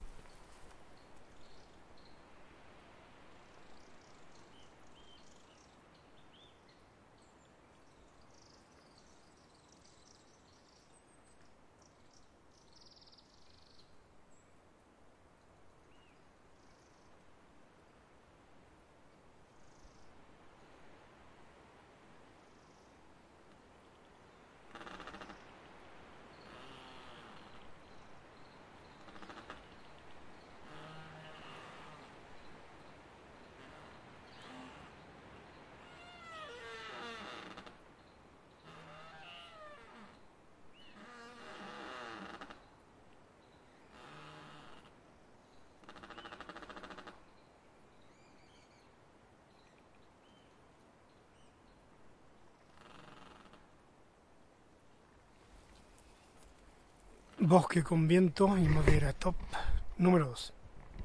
Forest windy creaking
Windy forest. If you're patient enough, you'll hear wood creaking on a windy day. Recorded with a DR-40.
Creaking, Wind, Forest